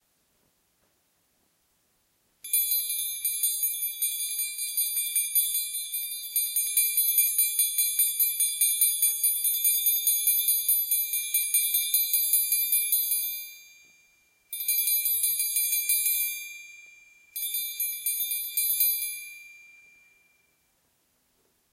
That darn dinner bell
Our 'dinner bell' it has become a well-known sound to me
bell, call, dinner, jingle, ring